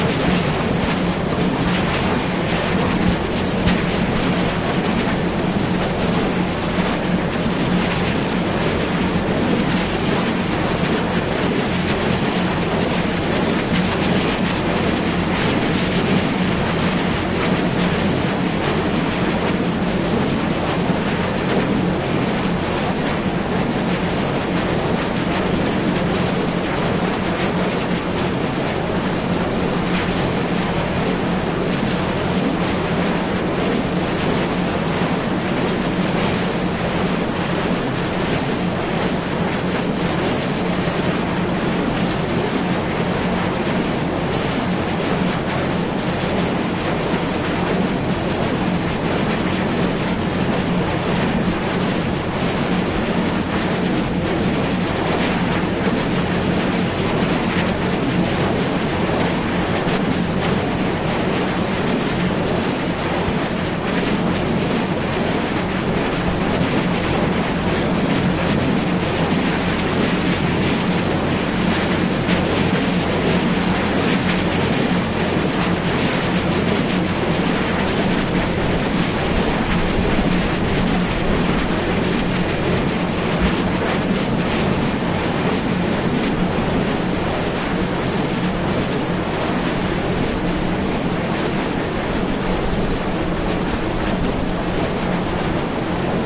Windrad - Parsteiner See - 201107
Wind wheel close-by lake Parstein. Germany (County Brandenburg).